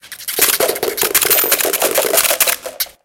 Soundscape LBFR Amelie & Bryan
France, Rennes, Soundscape